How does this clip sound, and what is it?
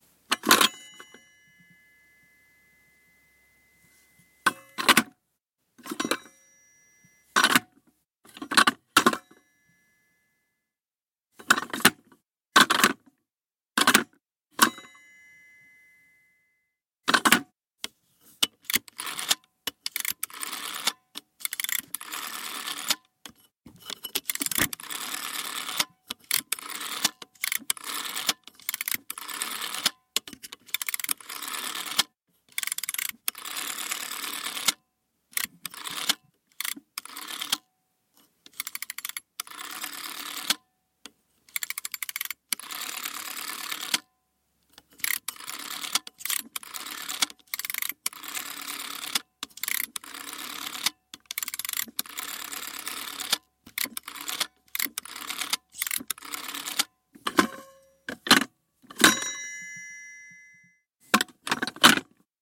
old phone
telephone, scheibe, old, hang-up, ring, appliance, dial, telefon, technica